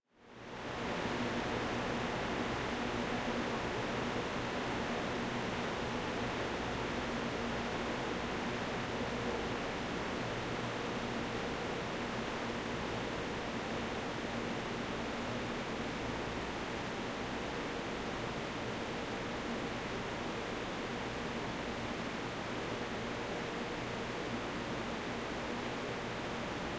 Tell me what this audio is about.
Edited version of one of my buzzing fly in the bathroom sounds processed with some subharmonics in Paul's Extreme Sound Stretch to create a ghostlike effect for horror and scifi (not syfy) purposes.
paranormal, fly, spooky, scary, stretch, evil, demonic, haunting, ghost, texture